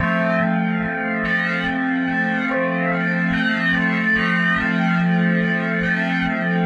Organiser Punisher F FX1 120BPM
Actually, it's a little bit of a sound package. But sharing is always good. My drum bass sounds can be used in house, nu-disco and dance pop projects. Obviously when I was listening, I felt that these sound samples were a bit nostalgic. Especially like the audio samples from the bottom of pop music early in the 2000s. There are only drum bass sound samples. There are also pad and synth sound samples prepared with special electronic instruments. I started to load immediately because I was a hasty person. The audio samples are quite lacking right now. There are not many chord types. I will send an update to this sound package as soon as I can. Have fun beloved musicians :)